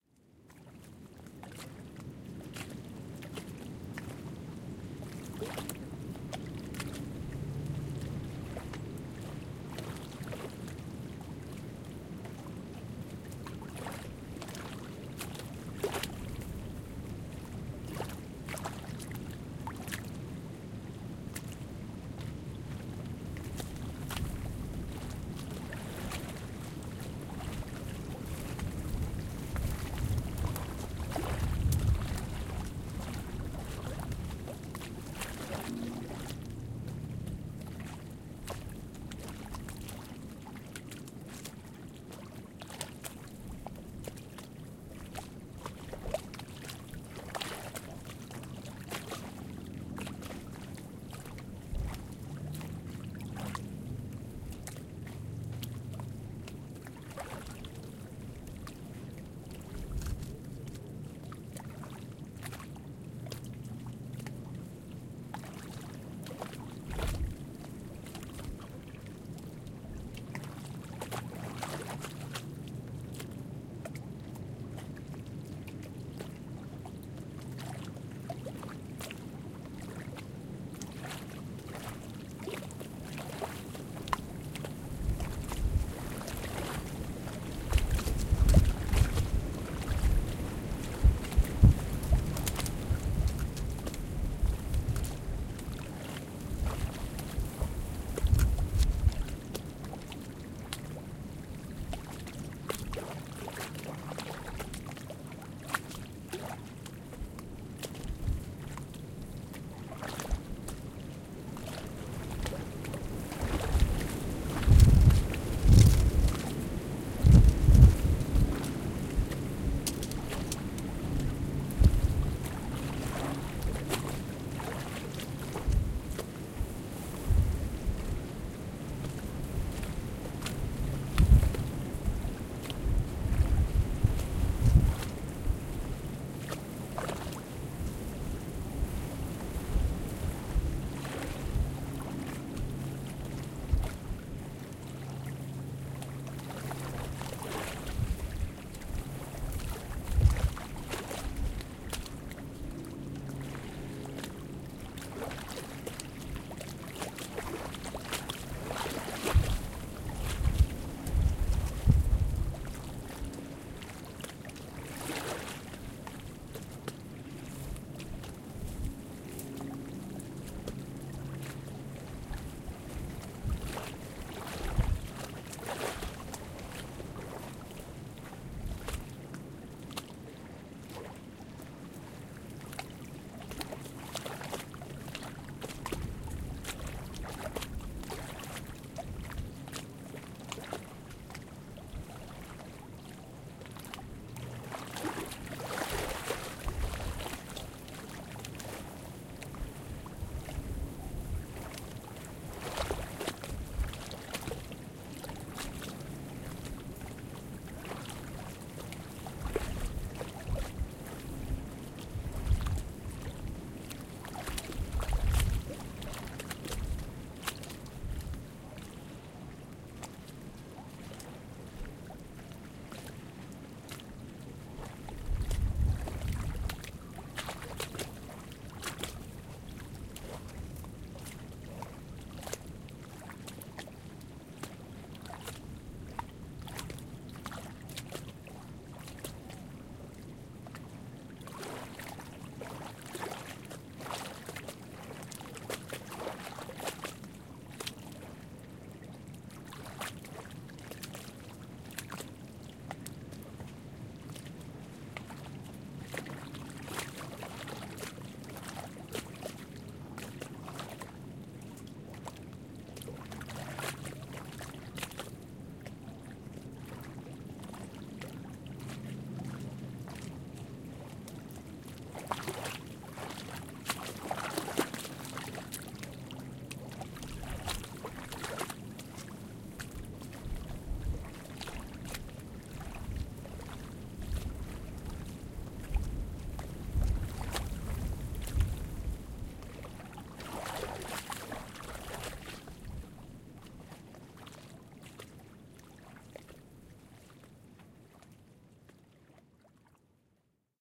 Wind can sometimes play havoc with recording --- BUT, with some luck you can get good wind recordings. Here is a recording of lake waves lapping at the short of a small lake in southern Illinois. This particular day, the winds were blowing steady out of the south at 25 miles an hour, with gusts even higher.
Recording made with my trusted Zoom H4N recorder, using its built-in microphones. Of course a foam wind-screen made this recording listenable. Without the foam wind-screen the recording would simply have been an obnoxious, unintelligable roar!
Also, since the waves were so loud to begin with, I did not have to crank the recording gain real high -- I had the gain only on 65 for this recording.